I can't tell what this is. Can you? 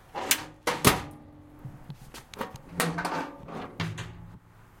Opening and closing the engine compartment lid.